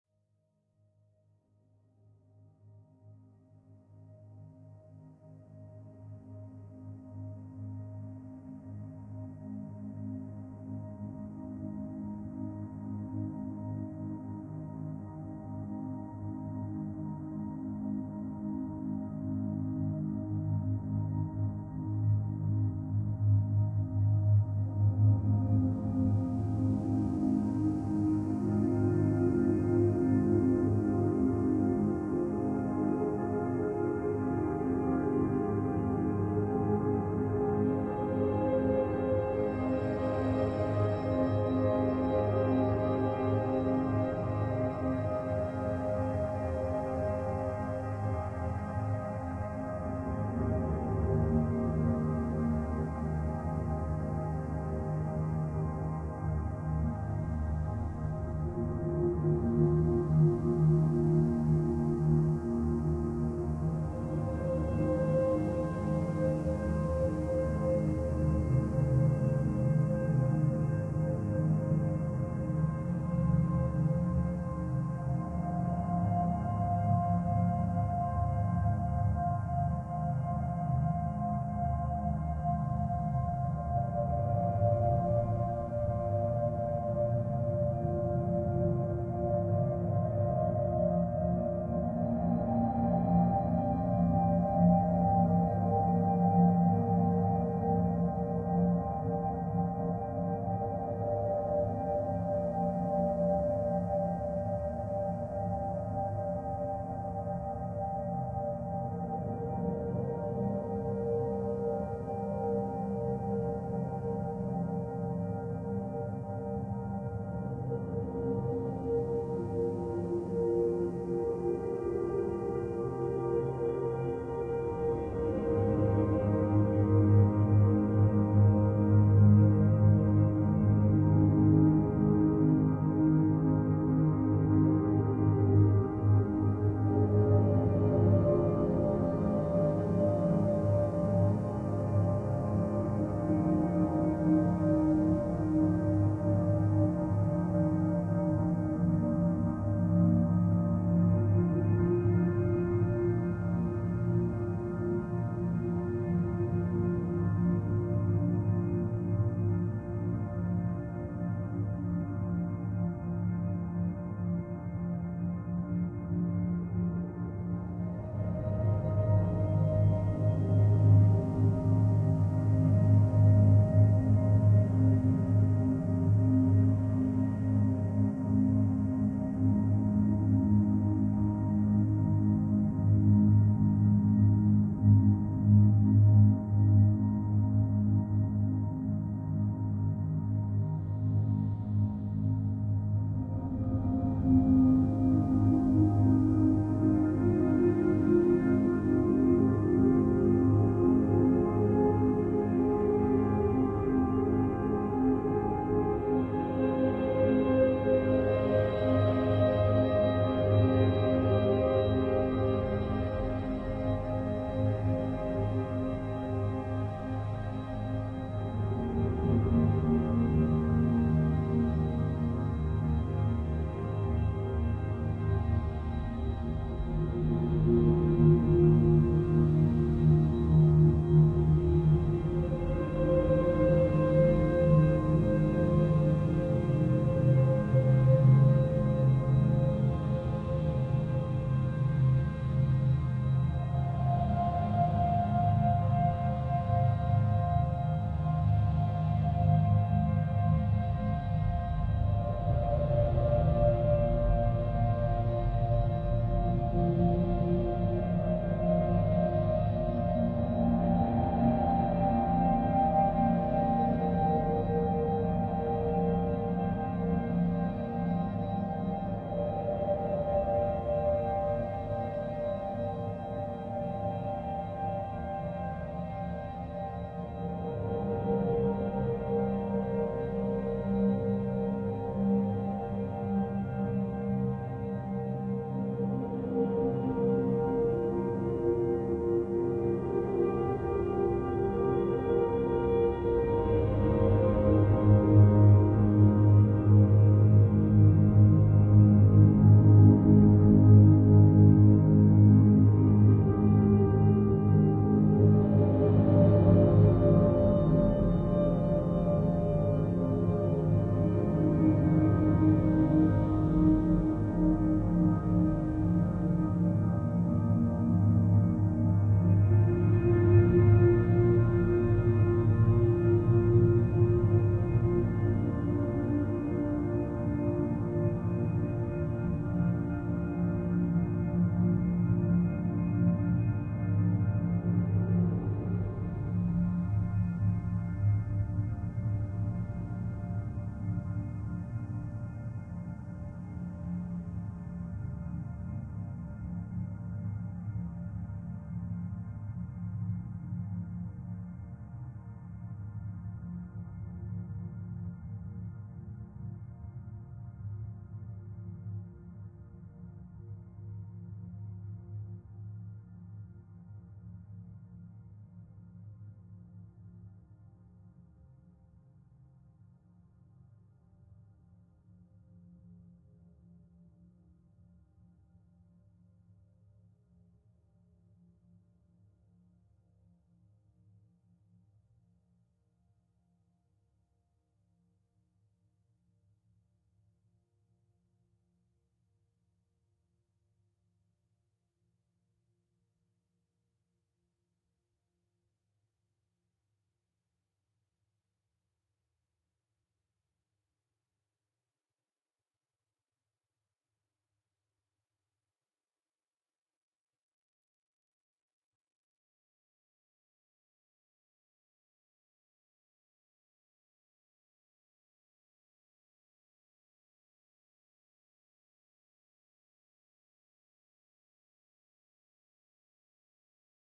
Ambient Wave 38
Looping, Ambiance, Drone, commercial, Ambient, Atmosphere, Piano, Cinematic, Sound-Design, Loop, Drums